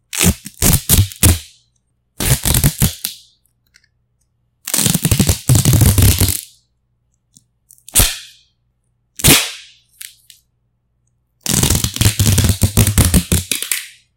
duck tape stretch
The sound of duck tape being pulled off the roll
crunch, duck-tape, rip, rubber, squeak, sticky, stretch, tape